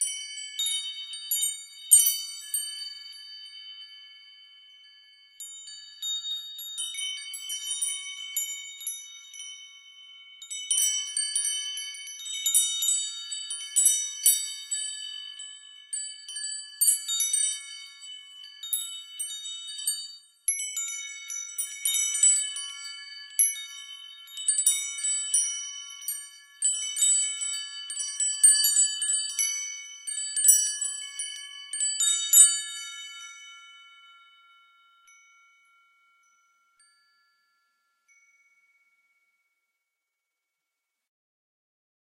Small windchimes
recorded using Zoom H4n
magical, windchimes, bells, chimes